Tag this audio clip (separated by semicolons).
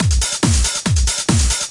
bmp; sequence; trance; hard; bass; hardtrance; arp; techno; now; 150; 140